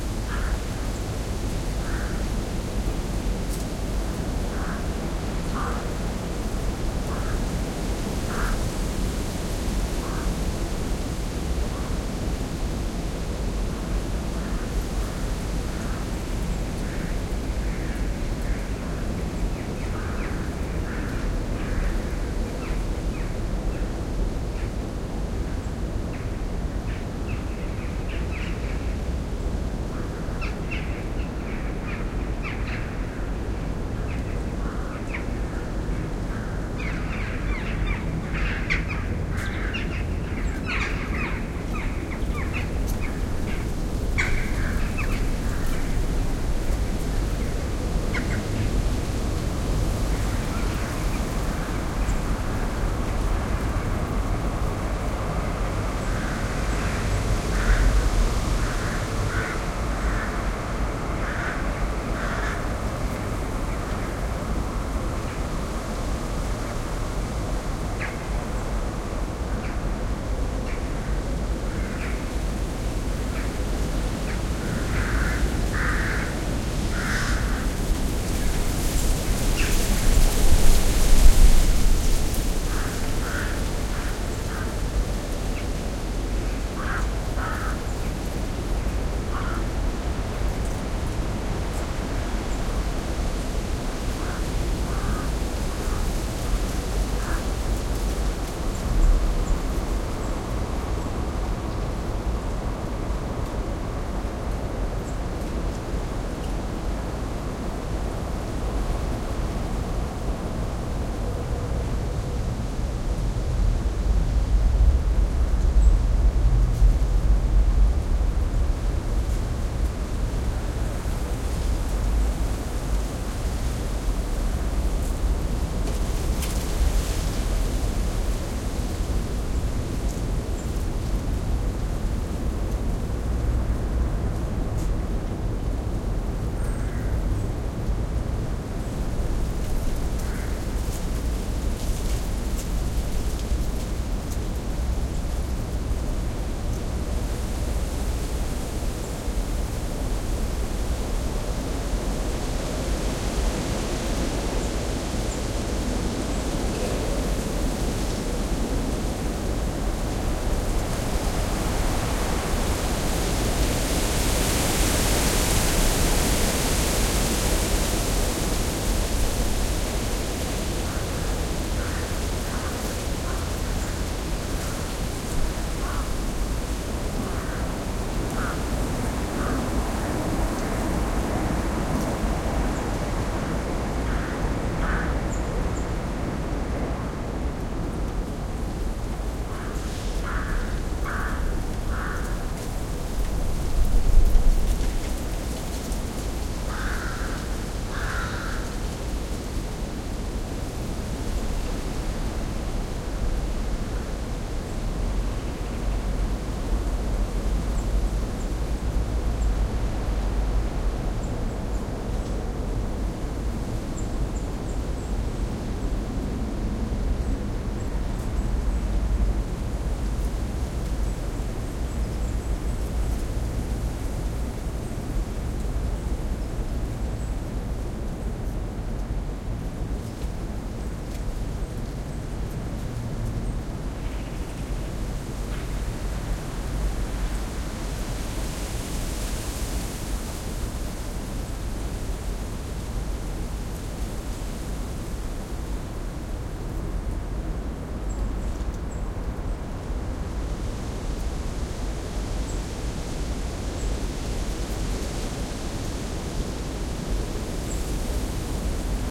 windy autumn
Just a recording to test my DIY windshield for those Rode NT1-A microphones.
Wendt X 2 mixer into R-44 recorder.
field-recording, wind, storm, crows, autumn, windshield